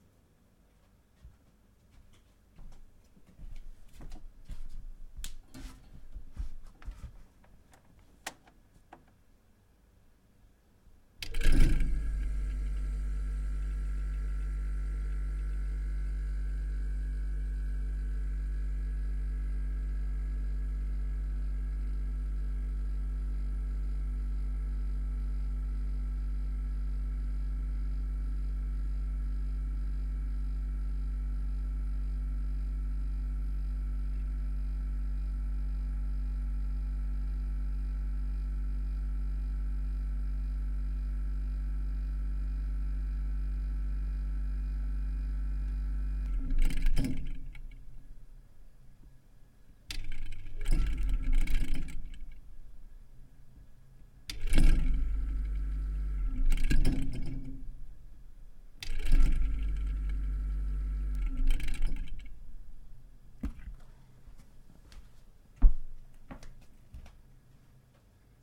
Old soviet fridge.